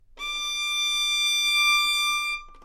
Part of the Good-sounds dataset of monophonic instrumental sounds.
instrument::violin
note::D
octave::6
midi note::74
good-sounds-id::3672
single-note, violin, multisample, neumann-U87, good-sounds, D6